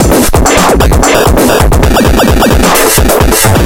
"glitch loop processed with plugins"